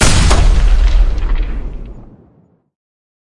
Gunshot from a heavy sniper rifle